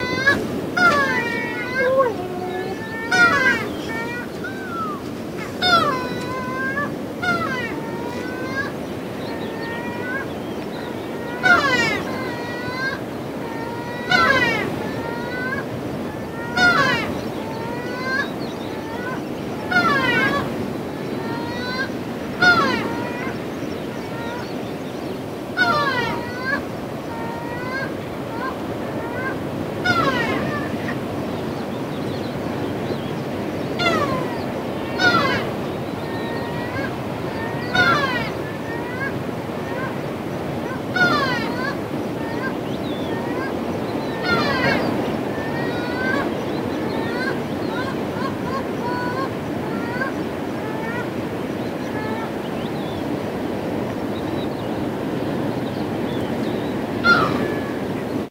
GAVIOTAS OMAN
A flock of idle seagulls flying about or just standing there, at the Al-Balled Site, near the Land of Frankincense Museums, in Salalah, Oman. (Mono 48-24; Rode NTG-2 Shotgun Mic/Marantz PMD Portable Recorder.)
magoproduction, oman, sea